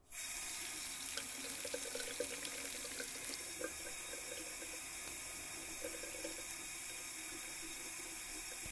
WATER RUNNING FAUCET 1-2
Water Faucet turning on
bathroom, running, Water